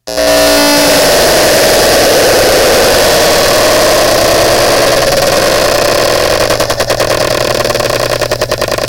Boise, Idaho again. Beacon changed signal for one hour, then returned to former sound.